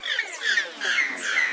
samples in this pack are fragments of real animals (mostly birds)sometimes with an effect added, sometimes as they were originally